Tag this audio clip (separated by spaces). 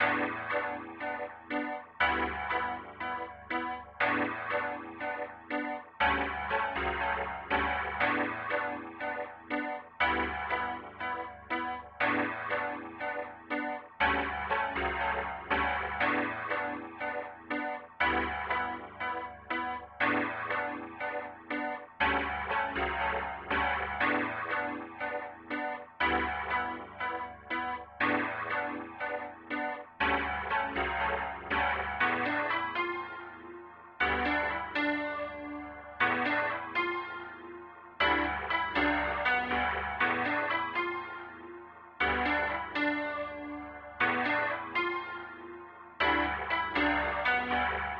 background detuned game instrument loop menu old piano